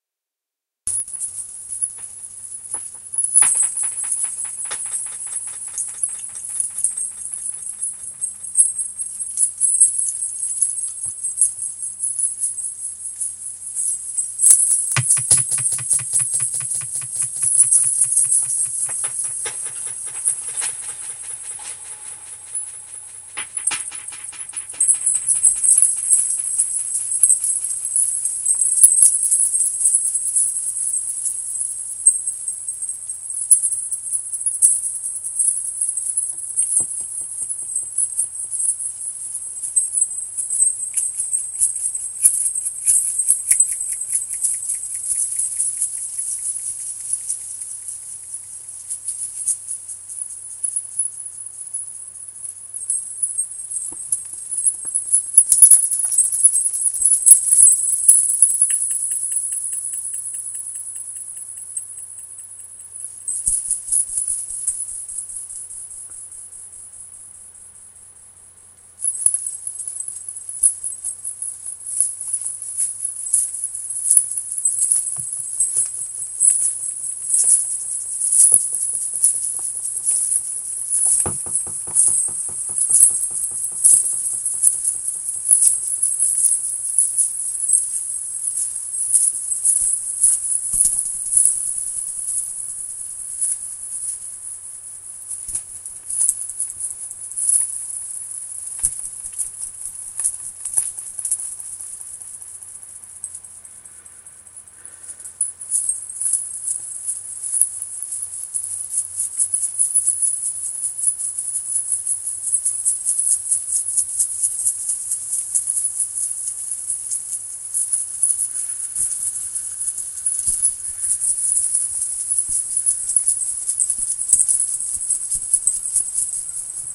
chains echo hall
I made this as jump point for another sound for a foley project for a movie. This was made using a number of chain dog leashes and my mxl mic along with an echo chamber affect in my sound editing software.